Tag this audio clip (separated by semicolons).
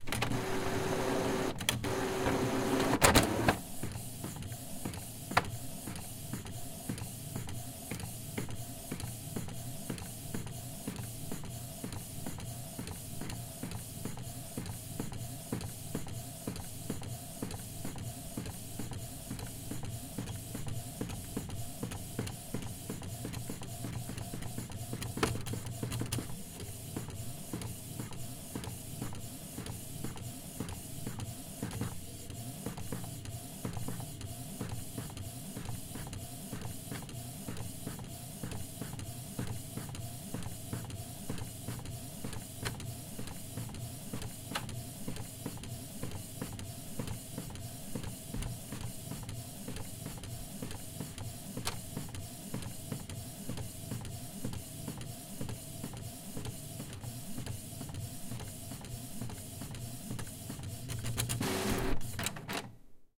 automation; computer; electronic; gadget; machine; mechanical; photo; photoprint; print; printer; printing; robot; robotic; textprint